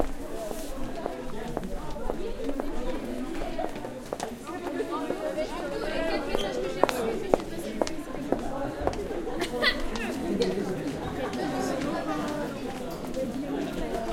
Queneau pas tallon
passge de pas avec talon sur sil carrelage
crowd, field-recording, foot, hall, people, step, voices